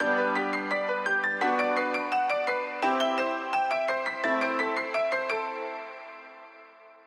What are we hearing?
Pinao Melody G Major
Piano melody in G Major